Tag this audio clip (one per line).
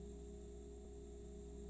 ambiance
ambient
dark
gong
reverb-tail